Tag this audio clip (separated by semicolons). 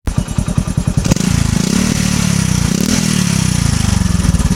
diy; field; mediawhore; recording